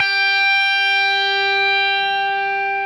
harmonic tone G made with guitar
harmonics, G, guitar